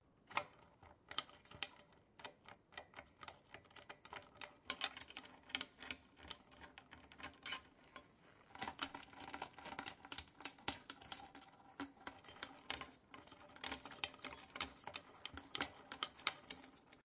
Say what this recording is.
mySound GWECH DPhotographyClass locker keys
key, keyring, keys, locker